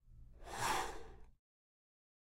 memory flashback sound